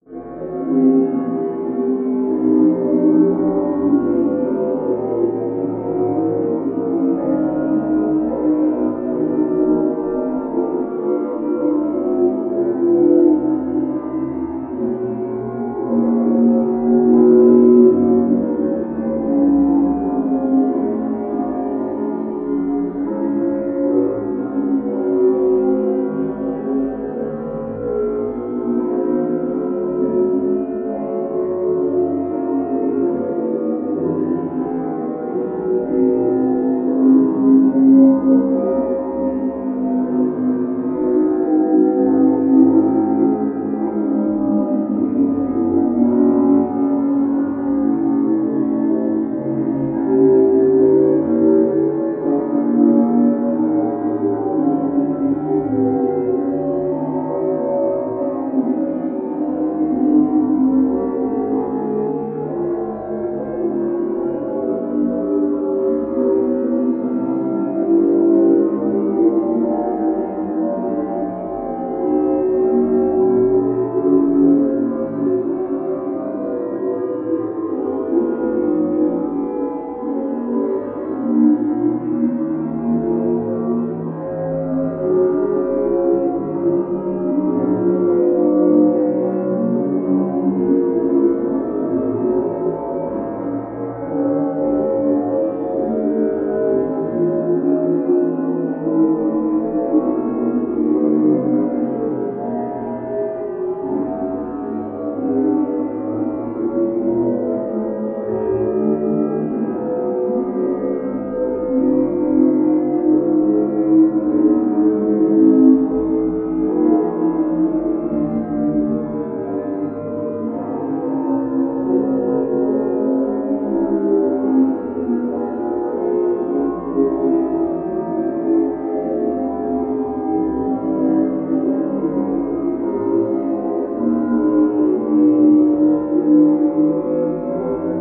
Alien ambience
Very alienating sound I created by heavily processing pink noise.